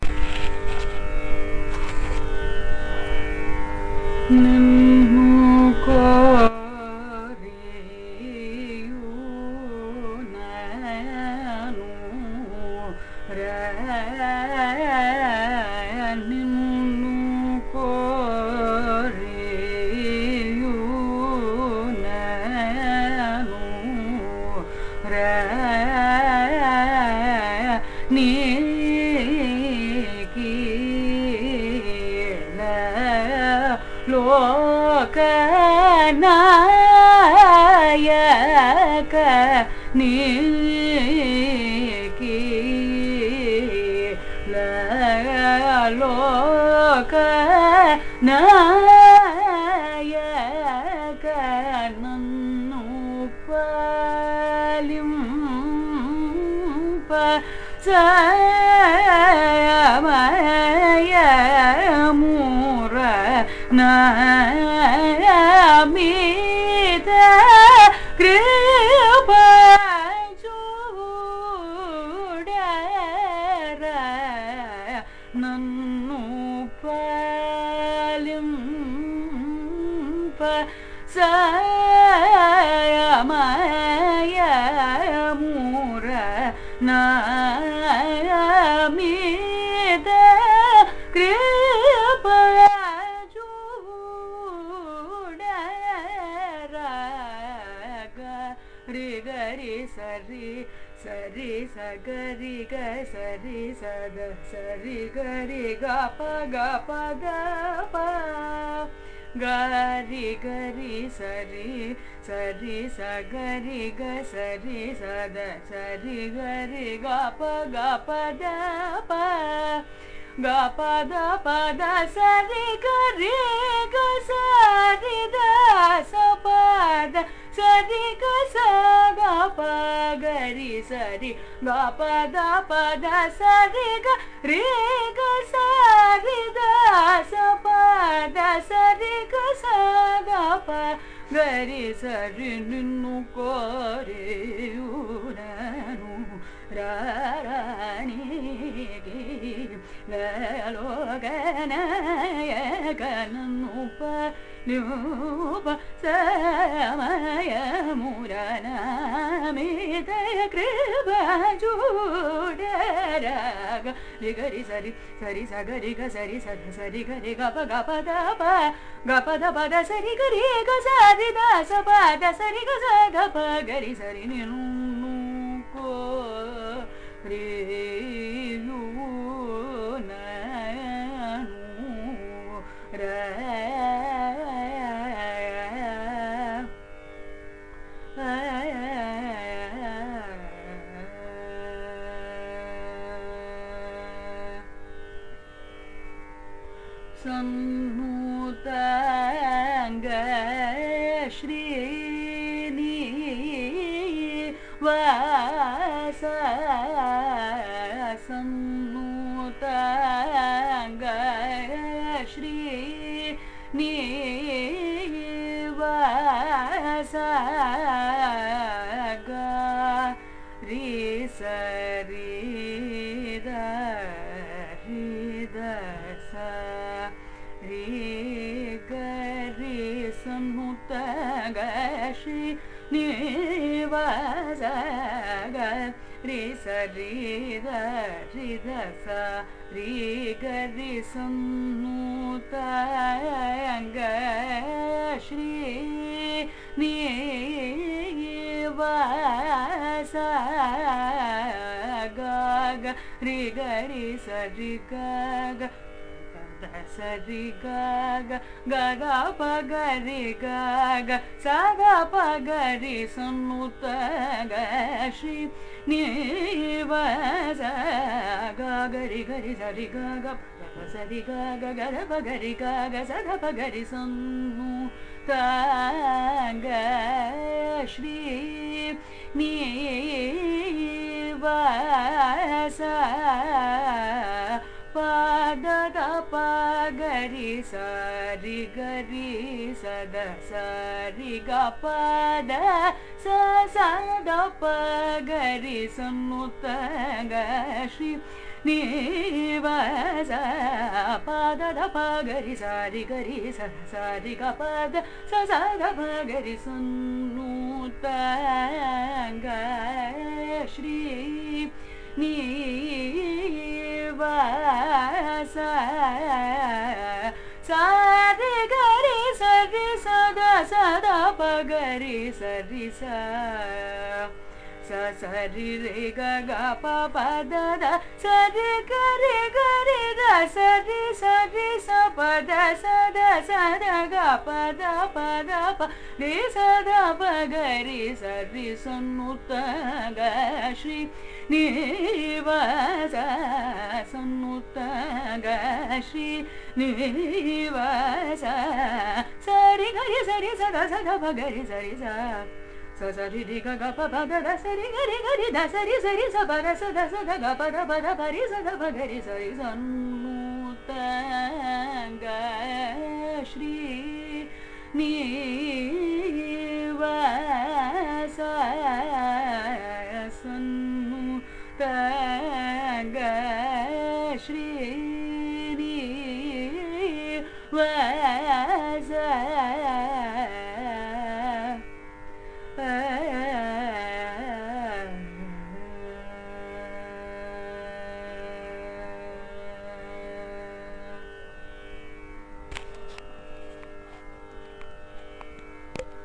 Varnam is a compositional form of Carnatic music, rich in melodic nuances. This is a recording of a varnam, titled Ninnu Koriyunnanura, composed by Ramnad Srinivasa Iyengar in Mohanam raaga, set to Adi taala. It is sung by Dharini, a young Carnatic vocalist from Chennai, India.